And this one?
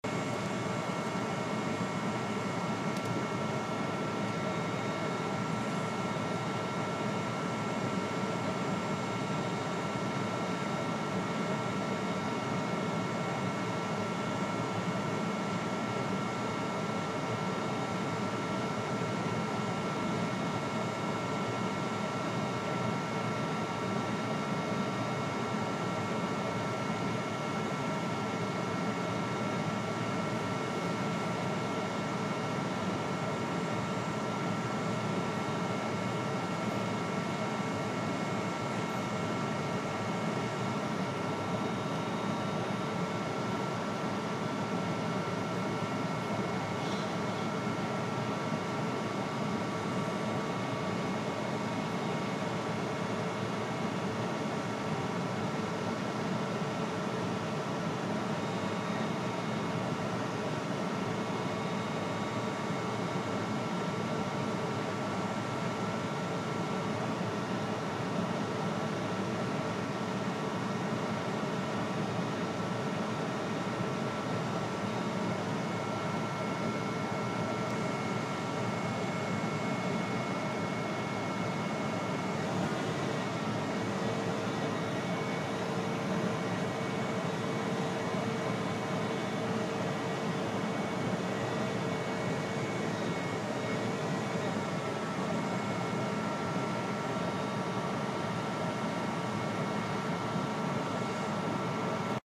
Metro is Waiting
metro waiting on station
metro, station, subway, train, underground, waiting